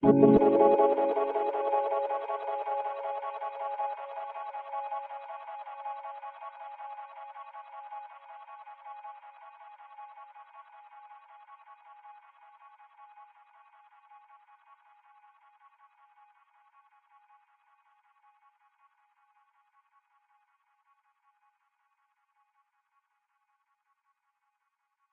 ae synthStabEcho Corsica S klissle remix 80bpm
echo, klissle, remix